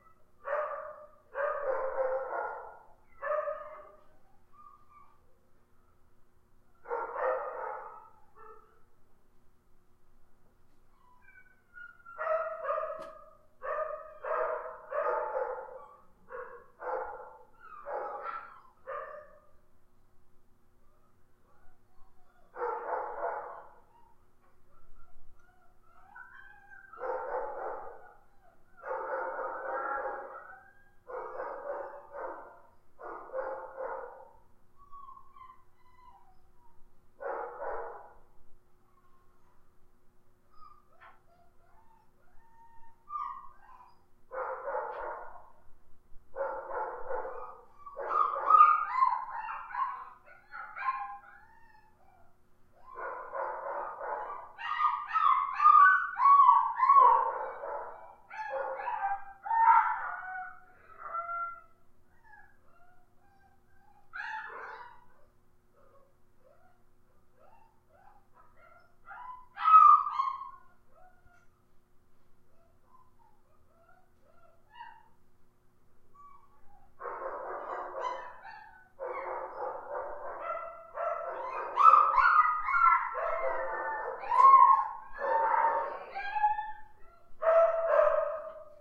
Recording of dogs barking and whimpering at an animal shelter. (No animals were harmed in the making of this recording). The recording mic used was a blue snowball mic.
barking, dog, shelter, whimper, whimpering